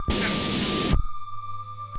funky voice snippet nagra 01
playing with the switches on my Nagra reel-to-reel - sort of a Ryoji Ikeda kind of thing popped out - I love surface noise, tape compression.. the whole media in fact!
white-noise, beat